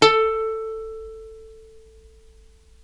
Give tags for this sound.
sample ukulele

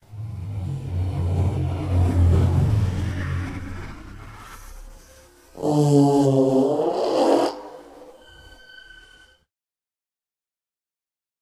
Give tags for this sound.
alien ambient android artificial cyborg droid mechanical robot robotic space synthetic

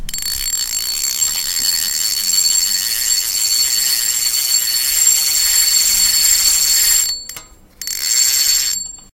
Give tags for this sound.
reel rod wind fishing

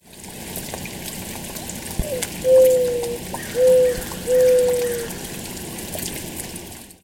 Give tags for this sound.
bird birding birds birdsong call cooing dove field-recording forest Mourning-dove nature song spring

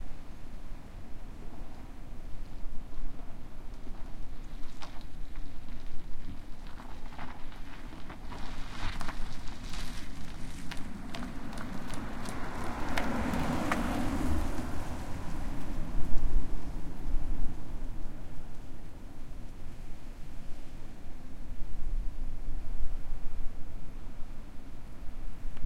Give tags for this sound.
traffic electric passing field-recording car street road cars

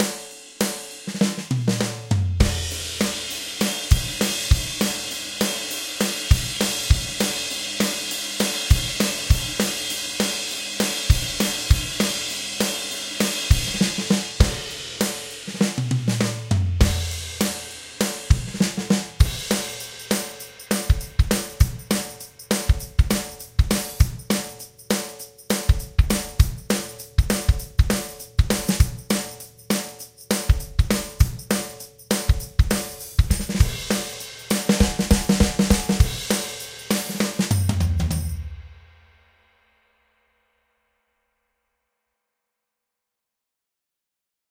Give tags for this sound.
140 Hard beat bpm drums n rock roll

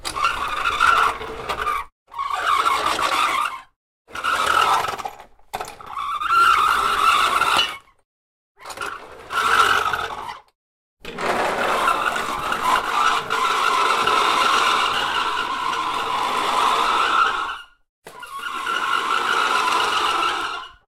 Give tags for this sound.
cleaner creepy effect horror squealing vacuum weird wheel

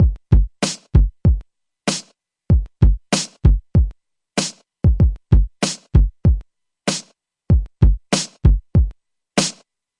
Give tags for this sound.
breakbeat hiphop loop sleigh

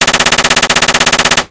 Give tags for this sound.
Video-Game Sub-Machine-Gun Call-Of-Duty Game Fire-Fight Shooting Assault-Rifle Battle Light-Machine-Gun Shots Weapon pgi Combat Modern-Warfare Gun Machine-Gun Battle-Field War Action Realistic Gunshots Rifle Firearm

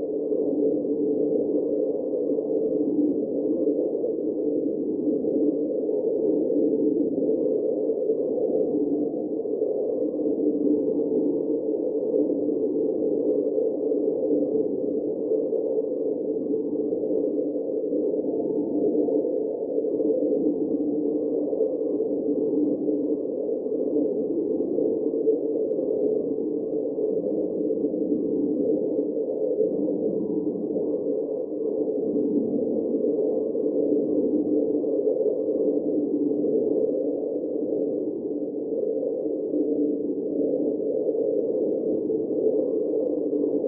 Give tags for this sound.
Cold Desert Night

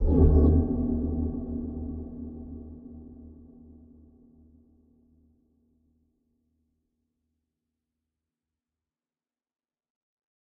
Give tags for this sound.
haunted background ghost suspense thrill creepy horror nightmare phantom spectre scary sinister

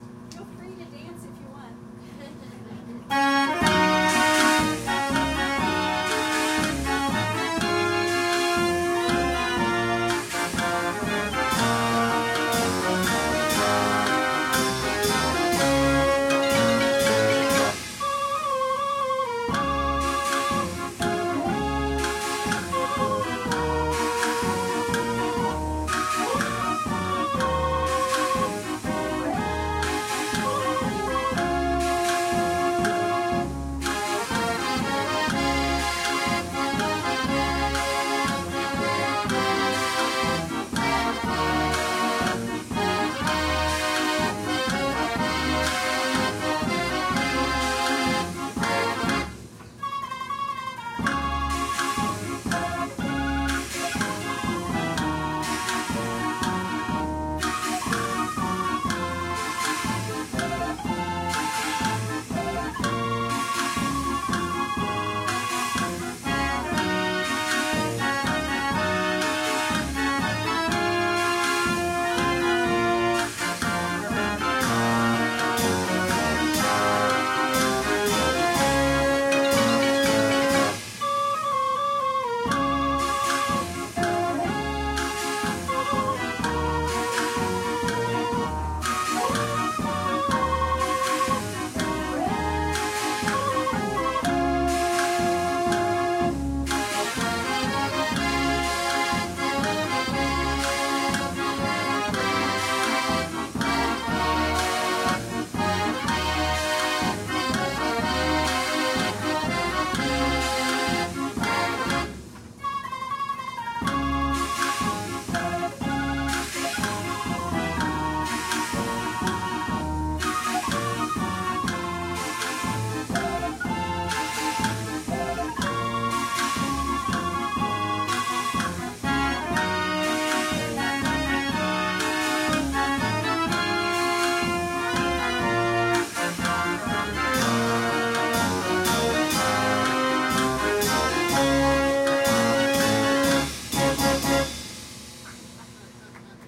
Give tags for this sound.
mechanized mechanical polka automatic organ musical-instruments-museum

